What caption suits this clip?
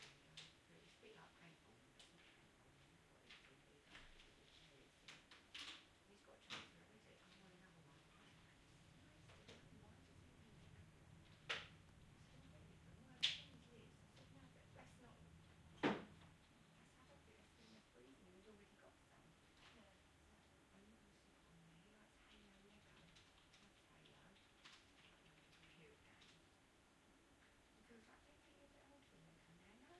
30-sec binaural field recording, recorded in late 2012 in London, using Soundman OKM II microphone.
This recording comes from the 'scene classification' public development dataset.
Research citation: Dimitrios Giannoulis, Emmanouil Benetos, Dan Stowell, Mathias Rossignol, Mathieu Lagrange and Mark D. Plumbley, 'Detection and Classification of Acoustic Scenes and Events: An IEEE AASP Challenge', In: Proceedings of the Workshop on Applications of Signal Processing to Audio and Acoustics (WASPAA), October 20-23, 2013, New Paltz, NY, USA. 4 Pages.
c4dm, ambiance, ambience, office, field-recording, london, qmul, binaural